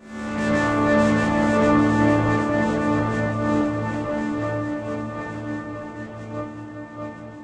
An acoustic guitar chord recorded through a set of guitar plugins for extra FUN!
This one is Asus4.
guitar, ambient, chord, electric